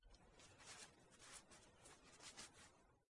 forcejeo tratando de quitar algo de las manos
personas, forcejeo, quitar